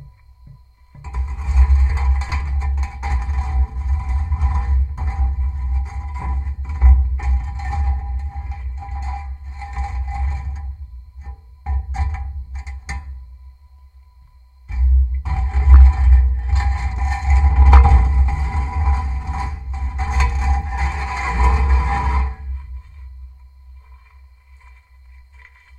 A contact mic attached to a bird feeder made that is made out of three plates